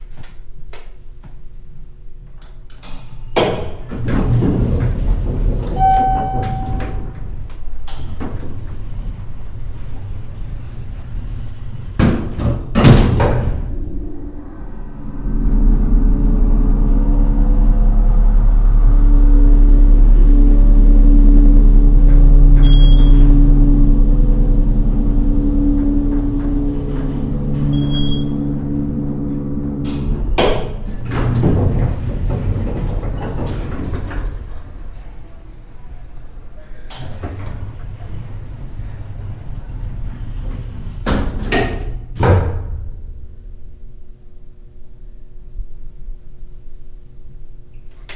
Elevator ascending two floors, much peaking on doors and electric motor sound
Elevator ascending two floors. Lots of peaking when the doors open/close, and when an extra motor sound begins. Recorded at Shelby Hall, The University of Alabama, spring 2009.
field-recording, motor, elevator